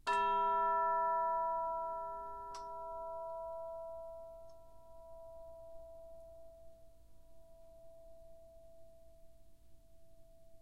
Instrument: Orchestral Chimes/Tubular Bells, Chromatic- C3-F4
Note: E, Octave 1
Volume: Piano (p)
RR Var: 1
Mic Setup: 6 SM-57's: 4 in Decca Tree (side-stereo pair-side), 2 close
bells, chimes, decca-tree, music, orchestra